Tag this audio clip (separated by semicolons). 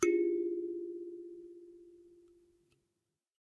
african; thumb-piano; ethnic; piano; kalimba; instrument; thumb